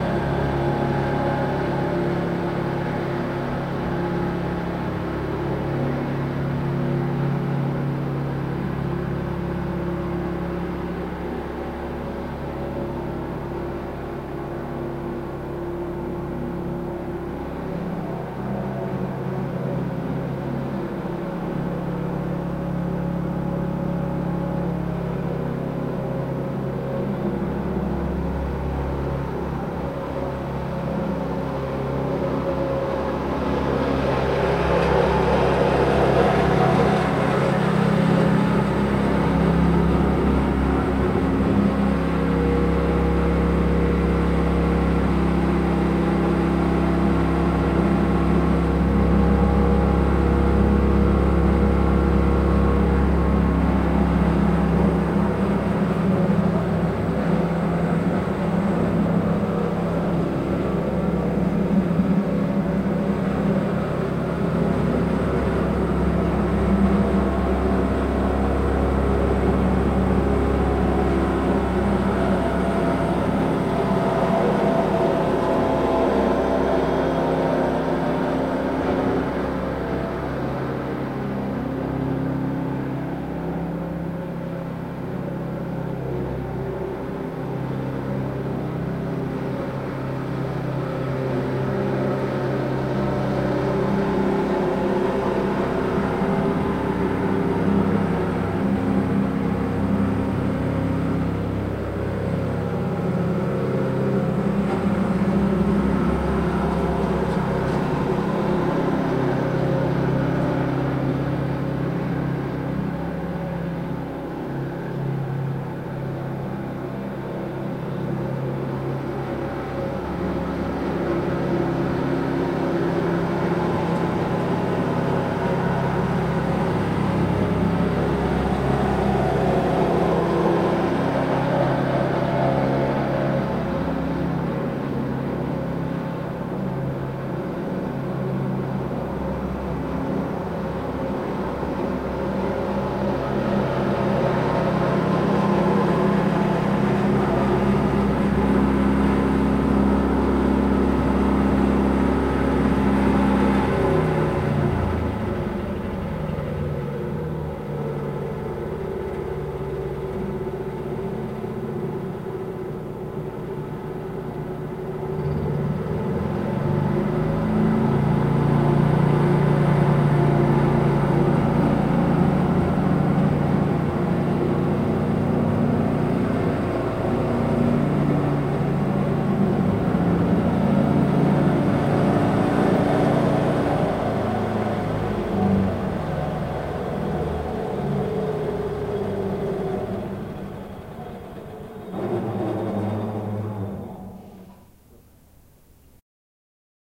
Person in side a house while in the back yard somebody is lawnmower the lawn closed widows.